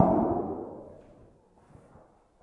Big sheet tap 3
All the sounds in this pack are the results of me playing with a big 8'x4' sheet of galvanised tin. I brushed, stroked, tapped hit, wobbled and moved the sheet about. These are some of the sounds I managed to create